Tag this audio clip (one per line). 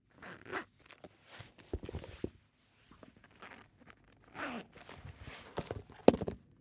abrindo
bolsa
ziper